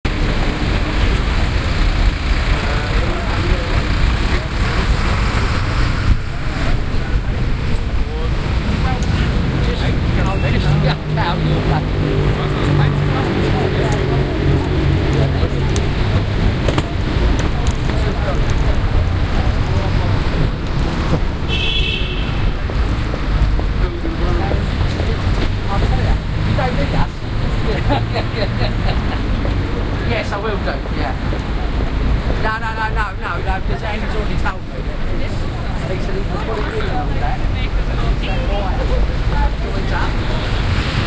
Crossing the road at Tottenham Court Road
soundscape, field-recording, ambiance, city, general-noise, ambient, atmosphere, background-sound, ambience, london